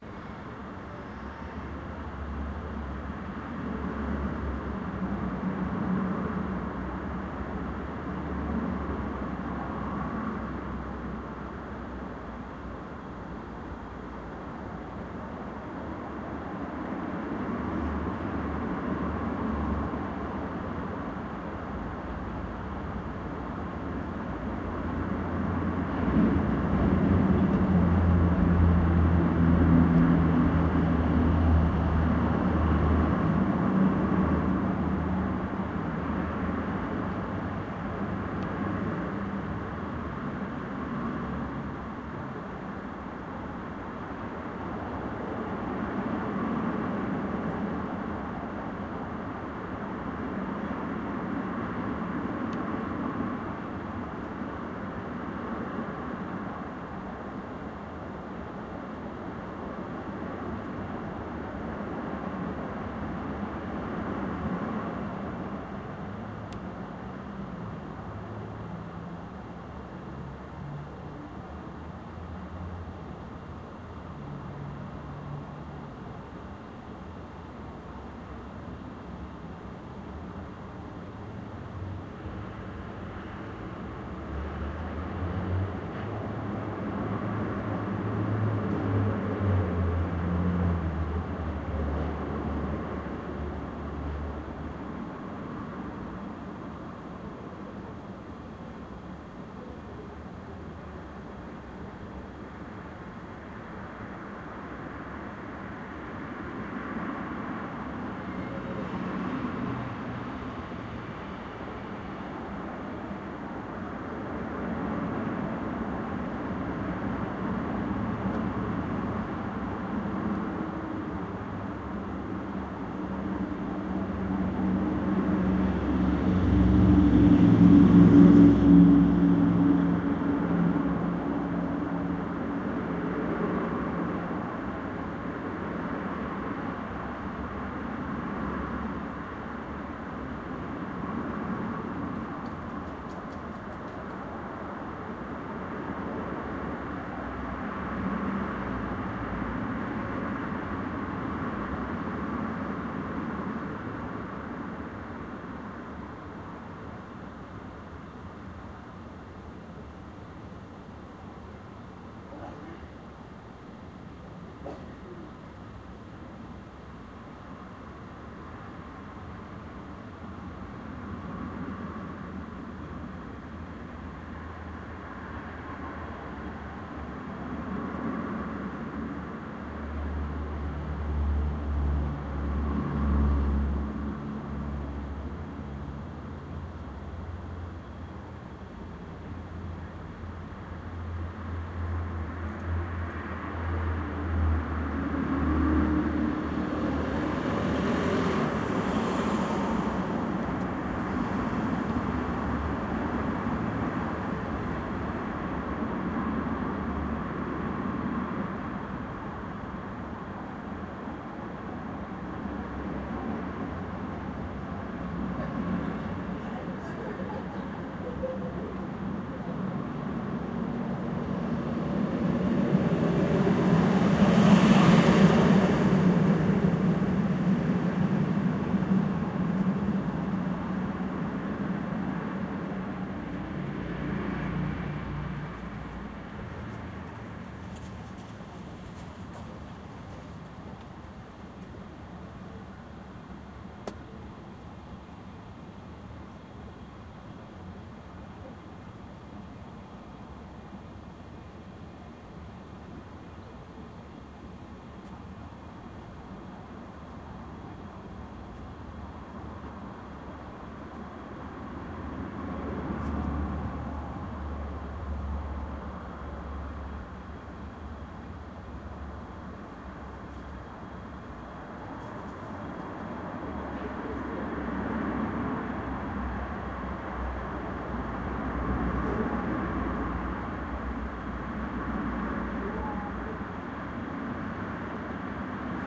Roadside Parking Lot 2
Cars can be heard driving by, along with a faint humming with from some machinery and a small amount of wind. Recorded with the microphone of a Nikon Coolpix camera.
vehicle, cars, drive, noise, background, truck, city, apartment, roadside, field-recording, traffic, car, ambiance, passing, street, lot, vehicles, driving